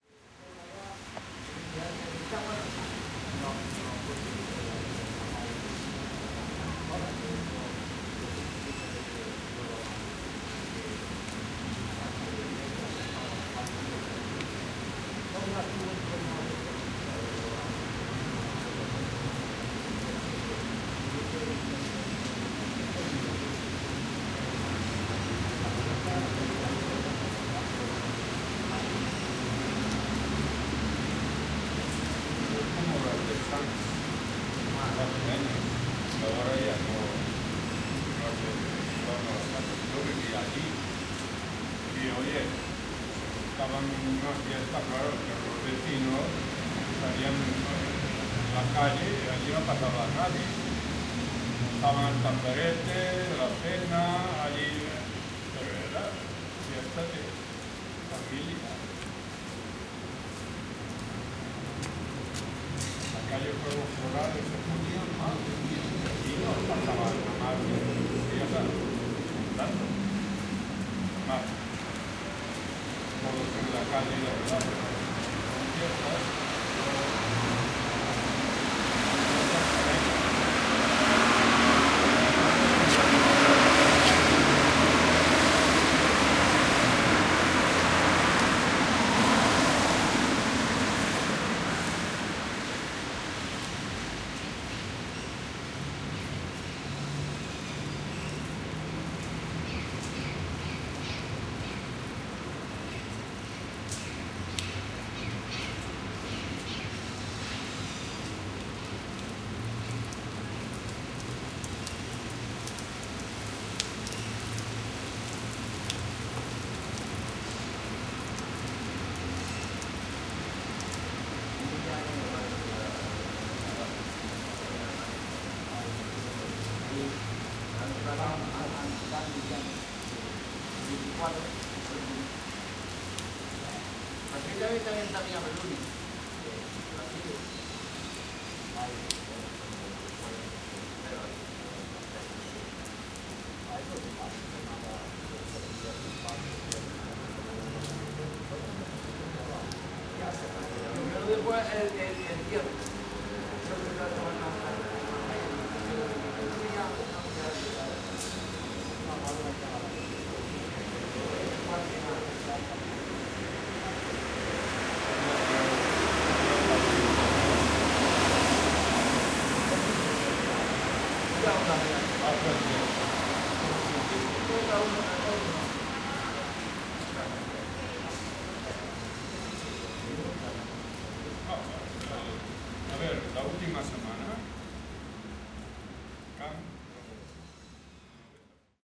22 08 08-17 29-Palacio de Justicia
From time to time rain also comes to Barna, even in August. Sounds from the rain falling down through the big streets. At the law’s office, we can hear cars passing as if there were no rain. Drops fall hitting the stones of the buildings. Birds, far away, sound like if they were happy to have some refreshing rain. At the porch of the law’s office, people wait the rain to stop, while they talk about things of other (maybe better) times.